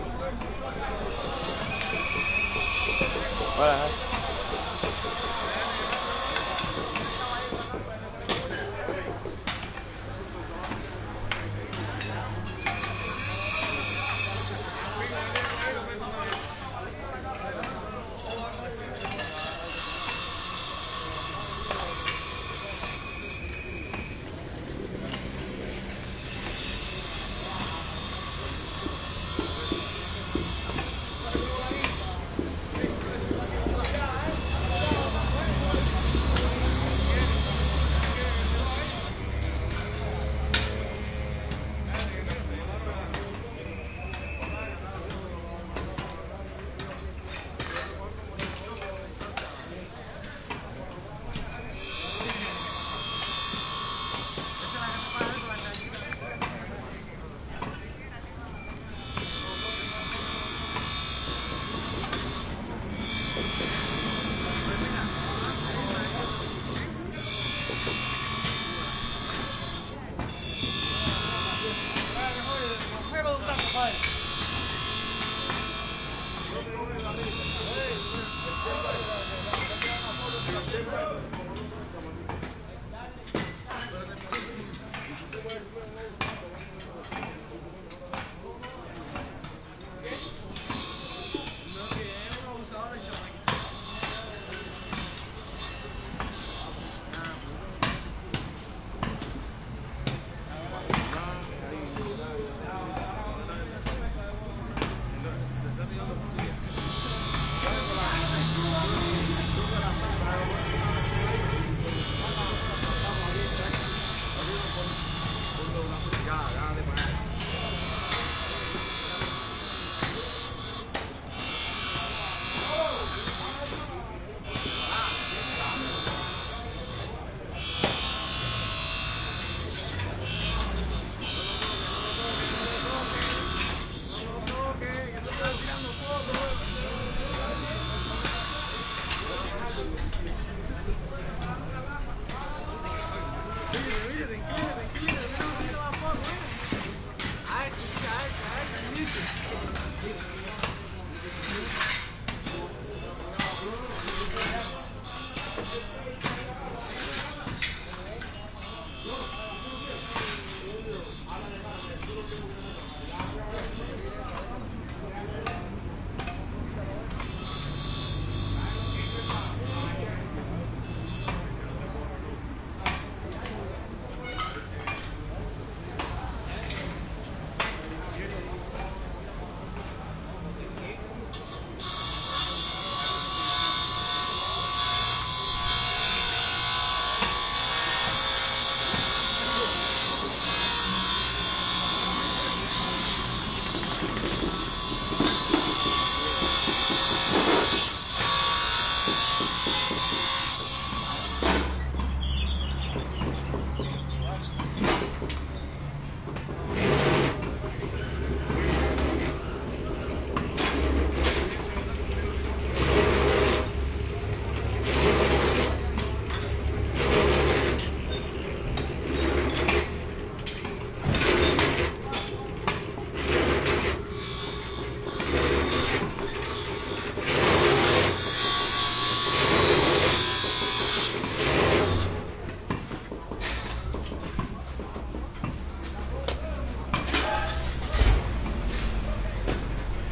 metal shop workshop working on car parts with disc grinder Havana, Cuba 2008